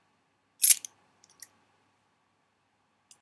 Coin sound made with the metal caps from champagne bottles.